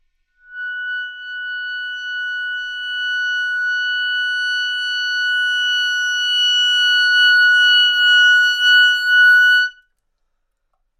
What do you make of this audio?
Part of the Good-sounds dataset of monophonic instrumental sounds.
instrument::clarinet
note::Fsharp
octave::6
midi note::78
good-sounds-id::759
Intentionally played as an example of bad-dynamics-crescendo